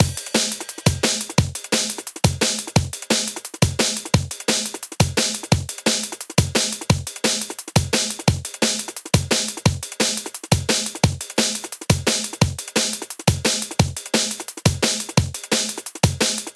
drumandbass drums
Created in ableton 87bpm.
drumandbass, loop, drumnbass, breaks, beat, break, breakbeat, drums, dnb